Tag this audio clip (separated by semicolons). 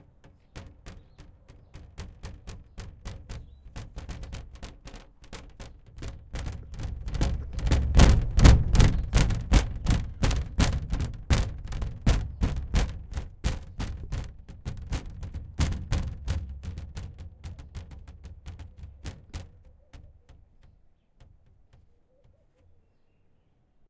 impact; OWI; rattling; plastic; strike; tapping; hit; thud; rattle; tap